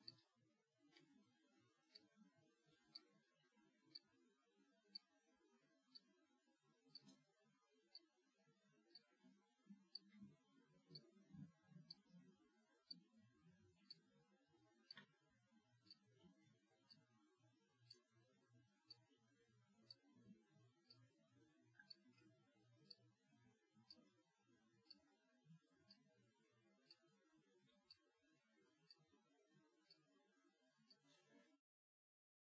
Watch ticking.
.sse